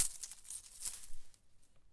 old leave whip
Recorded leaves cracking with AKG PERCEPTION 170 INSTRUMENT CONDENSER MICROPHONE.
crackling, needles